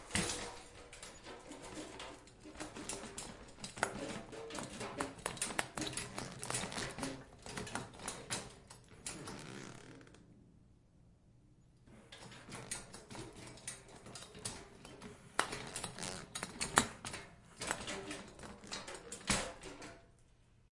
Noisy Rolling Metal Cart on Rubber Wheels
A wire metal shopping cart noisily rolling.